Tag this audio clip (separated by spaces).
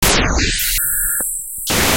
synth synthetic future electronic weird synthesis noise sound-design digital synthesizer